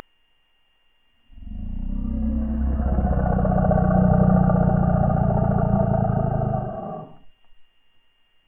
just a growl done by me but slowed down
growl, horror